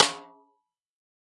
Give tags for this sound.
velocity 1-shot drum

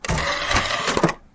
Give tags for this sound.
computer disc tray drive disk cd data dvd pc

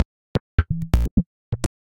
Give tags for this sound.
loops minimal techno bleep wavetable glitch reaktor loop

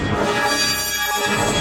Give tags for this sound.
scary aggrotech noise industrial

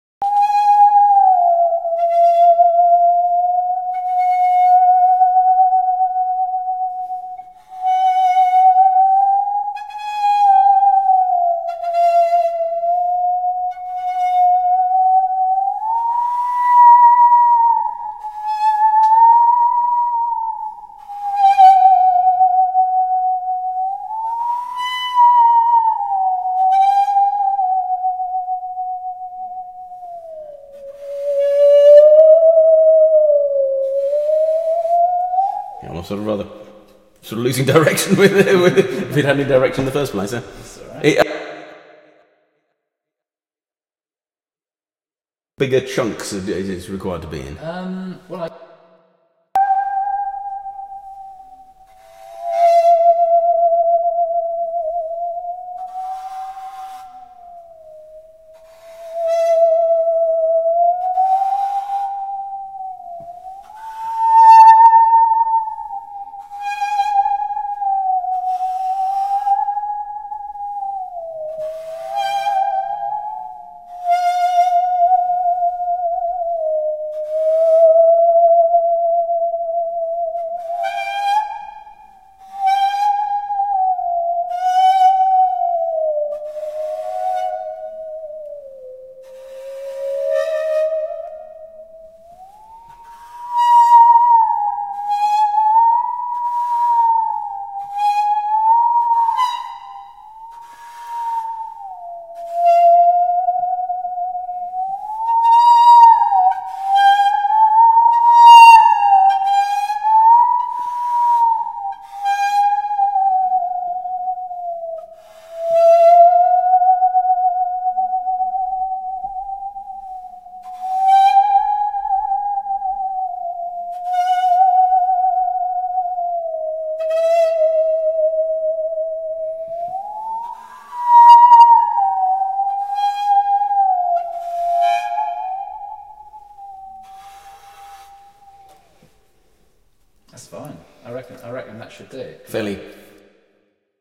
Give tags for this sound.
music musical saw spooky

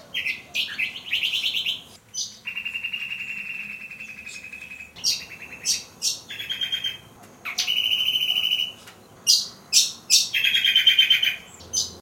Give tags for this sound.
finches chattering

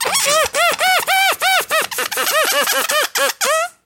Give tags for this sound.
rubber air recording pressure balloon simple fun smartphone Ballon experiment

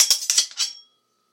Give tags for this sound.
clashing clash iPod ting ping struck strike swords metal-on-metal clang ringing metal clank steel slash hit knife metallic clanging ring stainless slashing sword ding impact

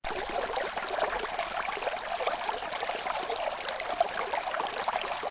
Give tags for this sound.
recordings streams water

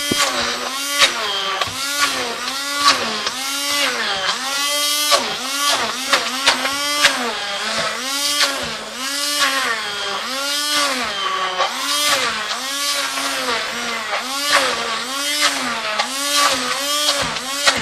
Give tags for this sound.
cooking thanksgiving dinner food family feast holiday

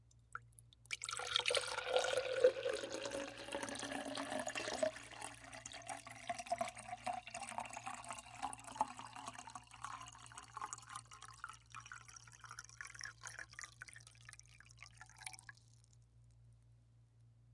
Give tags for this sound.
Continuous empty glass liquid pour

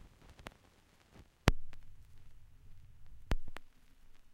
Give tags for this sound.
crackle dust hiss noise pop record static turntable vinyl warm warmth

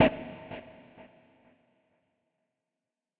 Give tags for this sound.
drum-hit processed

